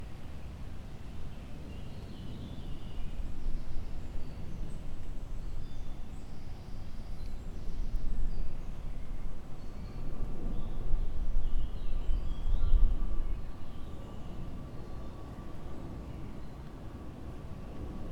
Background Noise, City, Birds, Jet